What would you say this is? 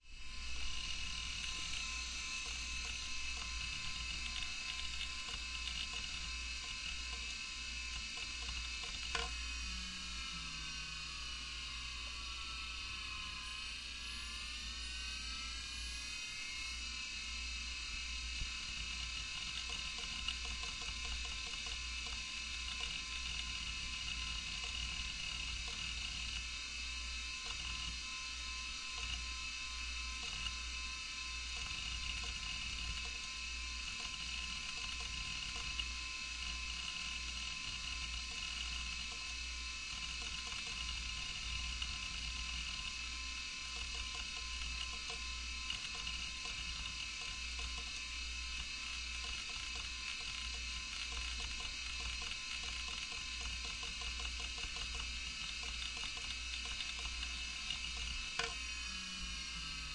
Electronic, Computer, Hard Drive - 1990s Compaq Hard Drive, Spooling Up, Turning Off 02
Recording of a 1995 Compaq computer desktop hard drive. Features electronic whirring, powering up / down sounds, whirring, clicking, "memory access" noise. Could be used for e.g. a movie scene with computers in the background, glitchy techno, etc.